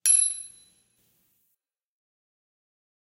Nail Drop 008
Iron Nail dropped on Metal Stage weights... Earthworks Mic... Eq/Comp/Reverb